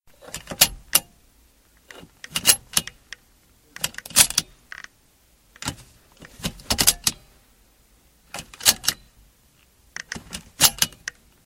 Seatbelt, In, A
Raw audio of a seatbelt in a car being buckled in numerous times.
An example of how you might credit is by putting this in the description/credits:
Buckle, Buckled, Car, Connect, Connected, In, On, Safety, Seatbelt, Vehicle